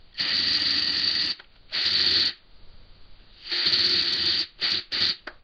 Spraying a spray paint can.